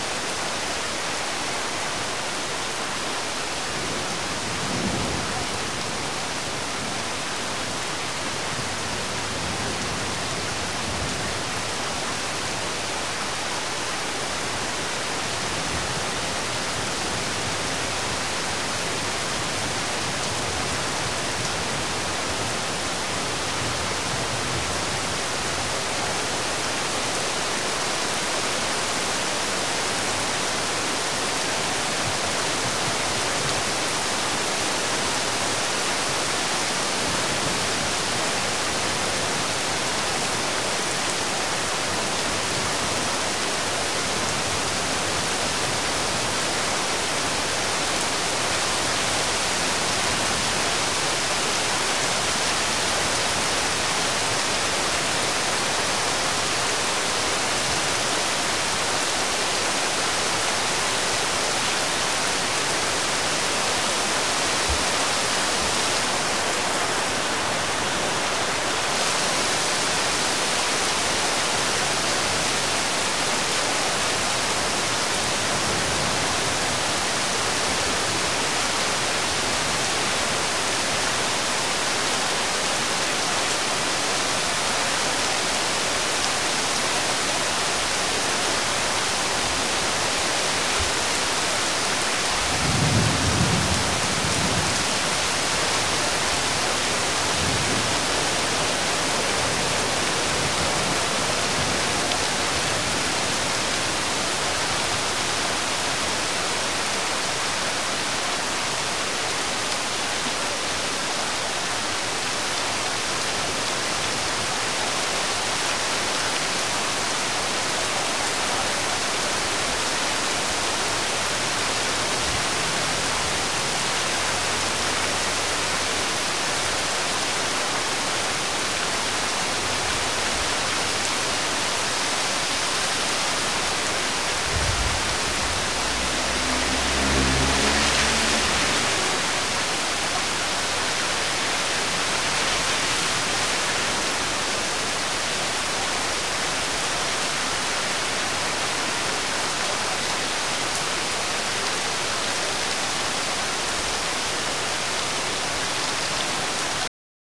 Heavy Rain,
recorded with a AKG C1000S
rainstorm, raining, thunderstorm, field-recording, water, strike, ambient, nature, ambience, storm, thunder-storm, rain, thunder, lightning, heavy, weather, drip, shower, rolling-thunder, wind